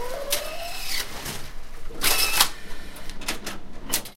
Designa Factory Sounds0005
field-recording factory machines
factory, field-recording